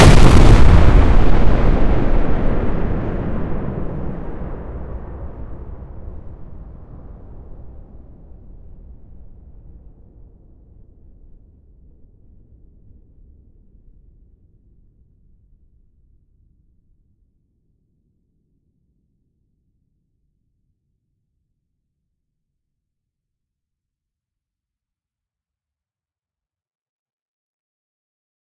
ExplosionBombBlastAmbient8-DRemix
A totally synthetic explosion sound created by mixing (with altered envelopes and panning) some other sounds from this series -- those named similarly but with numbers 8, 9, A, B, C, and D.
blast, bomb, good, gun, synthetic